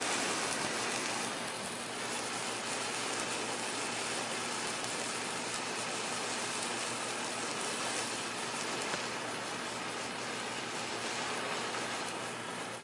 Road Flare1
This is the sound of a road flare burning and it was recorded with the mid element of a Zoom H2N. I may try recording one of these again in a quieter place other than my neighborhood.
burning, fire, flare